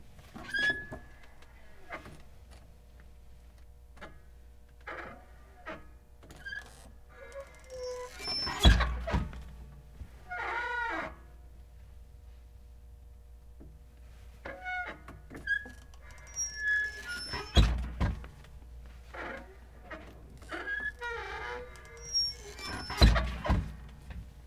swinging door in tight space +light electric hum
hinge, swinging